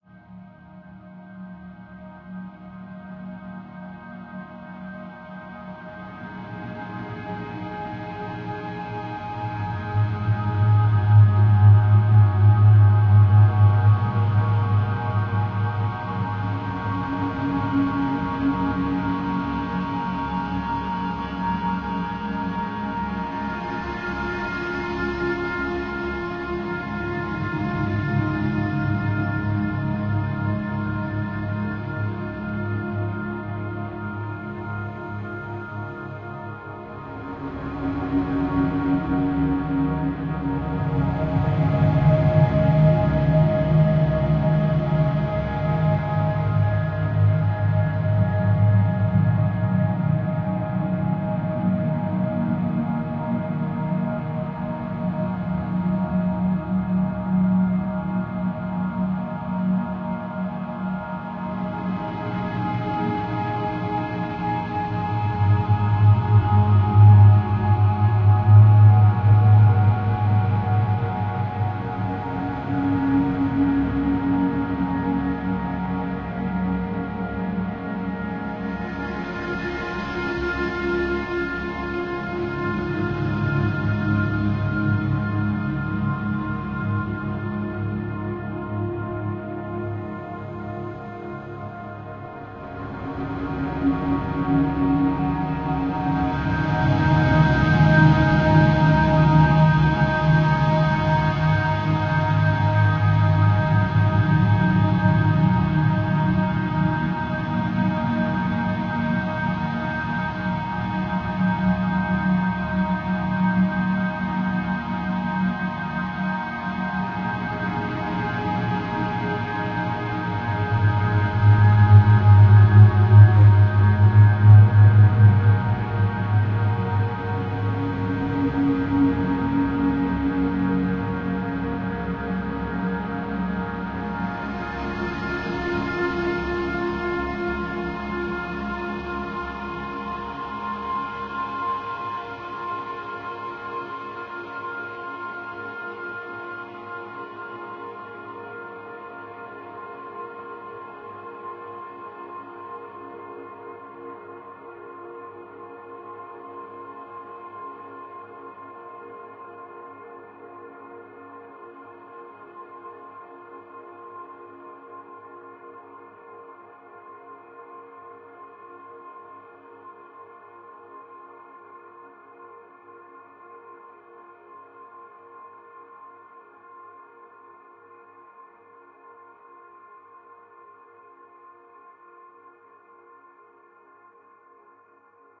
Ambient Wave 41
As the title applies, a long ambient sound stretched to oblivion.
alive, ambiance, ambient, Dreamscape, effect, Elementary, instrument, midi, One, samples, sampling, vst